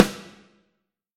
BMDK SNARE 001
Various snare drums, both real and sampled, layered and processed in Cool Edit Pro.
drum, processed, sample, snare